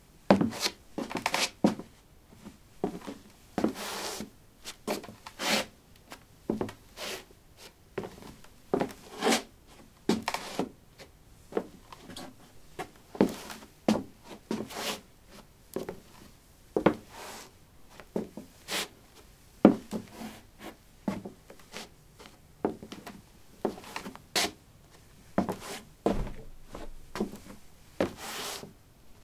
wood 11b sneakers shuffle
Shuffling on a wooden floor: sneakers. Recorded with a ZOOM H2 in a basement of a house: a large wooden table placed on a carpet over concrete. Normalized with Audacity.
steps, footstep, footsteps